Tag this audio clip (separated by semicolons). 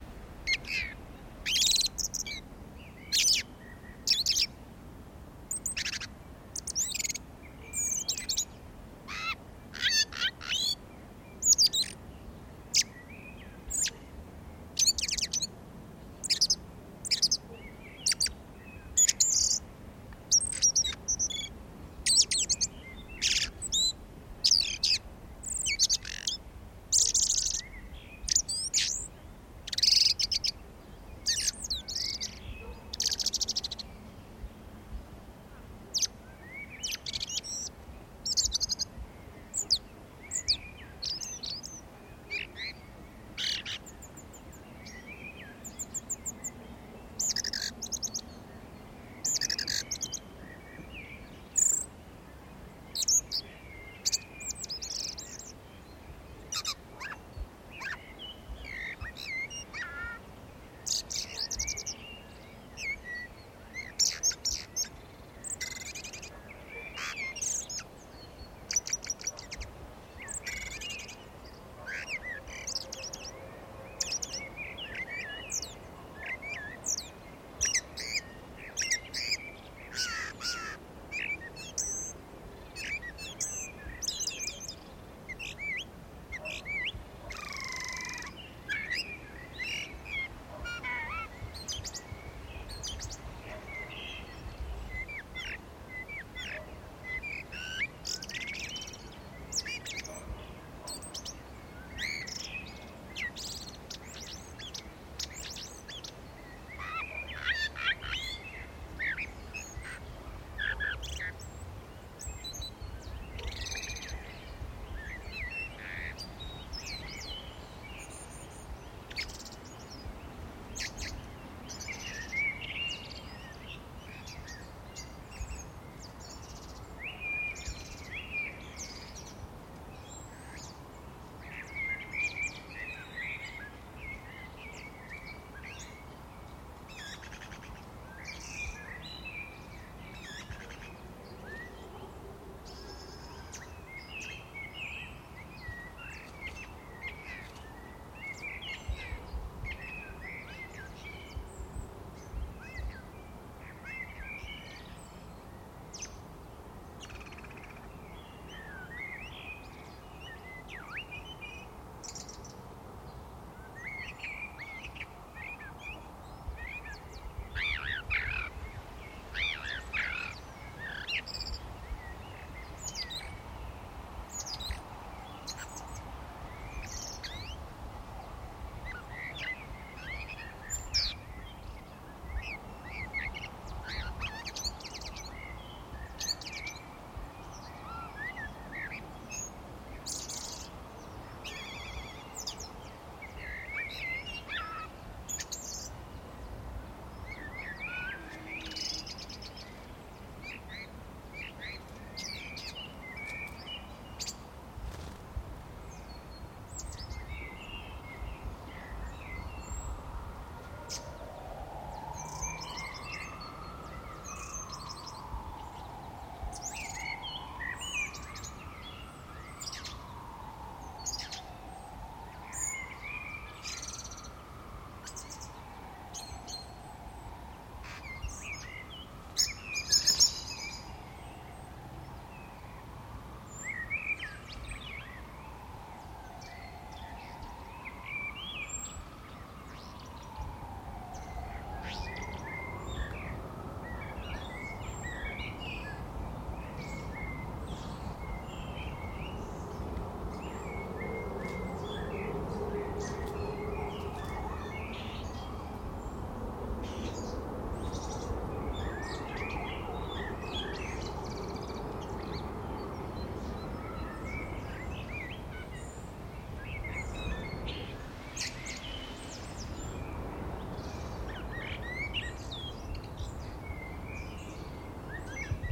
atmosphere; birds; spring